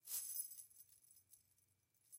chains 7rattle
Chain SFX recorded with AT4033a microphone.
clattering, metal, chains